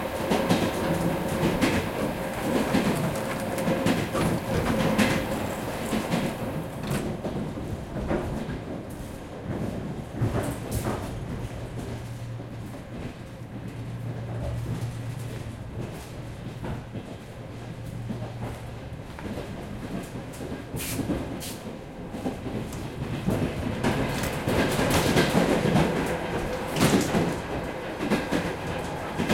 suburban train 4
Moscow region suburban train. Old wagon interior.